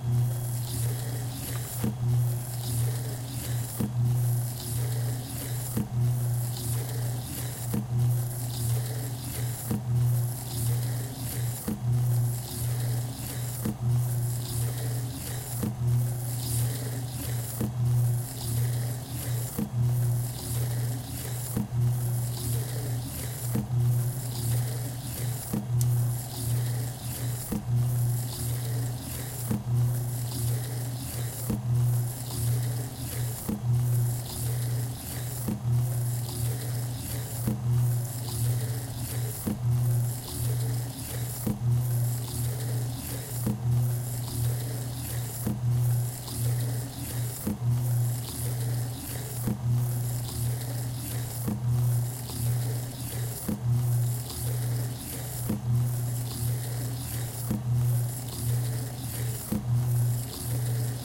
reel to reel tape machine single reel turning cu mechanical thuds
mechanical, turning, tape, machine, single, reel, thuds